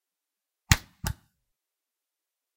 TV OFF SWITCH
A televison (tv) switch.
click, domesticclunk, electric, electricity, off, switch, switches, television, tv